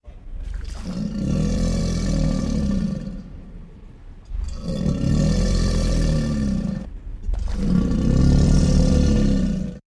Alligator Growls 02
sound of growls alligator
Alligator, animal, guttural